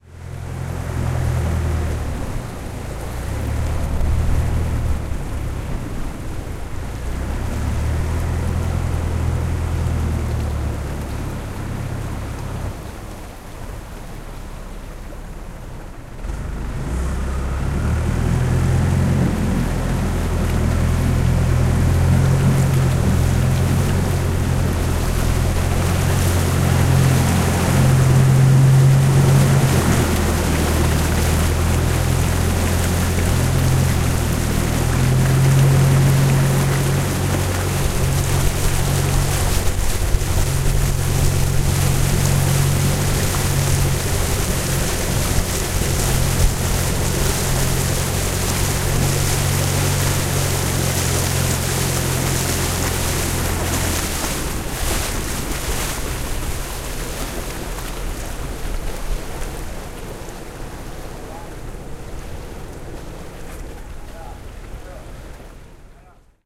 Long-tail boat
On a longtail-boat on a Khlong in Bangkok, Thailand. Recorded with an Olympus LS-11.